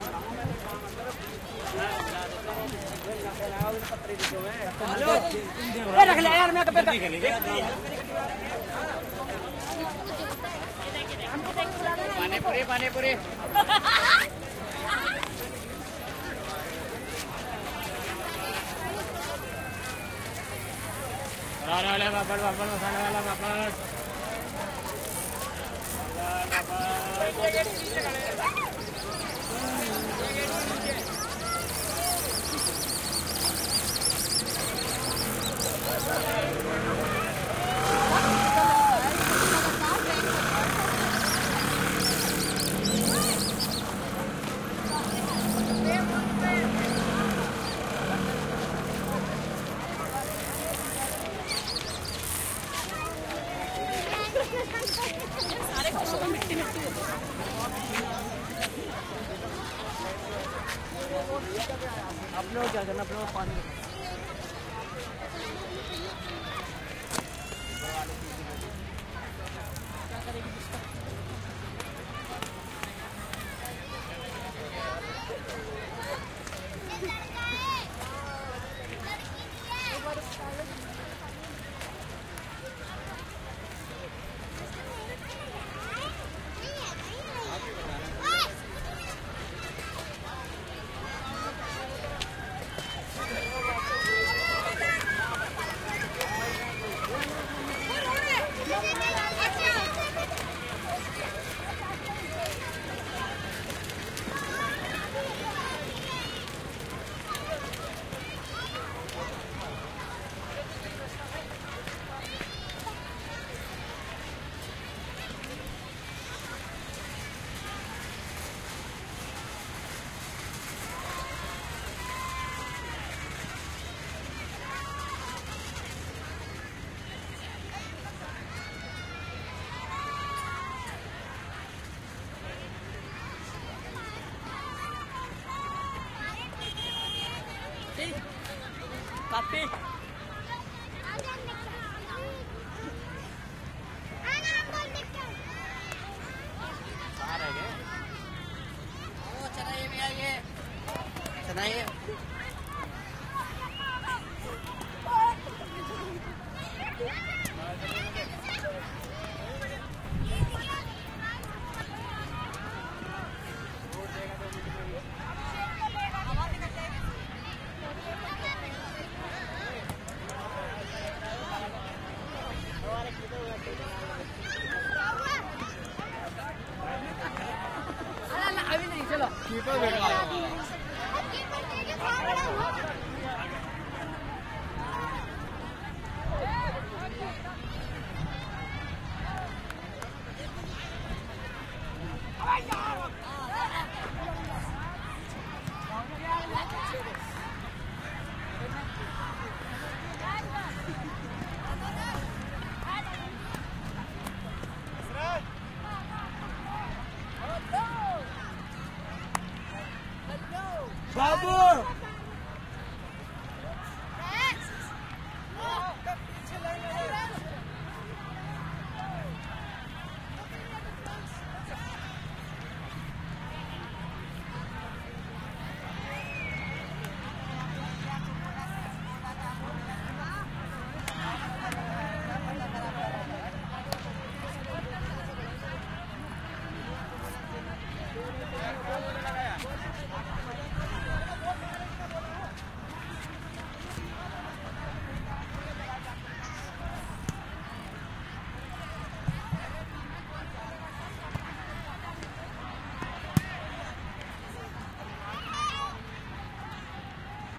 Soundwalk at sunday around India Gate and surrounding fields.